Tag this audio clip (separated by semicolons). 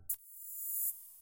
future; fx; game